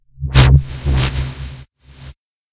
A big swoosh effect with heavy processing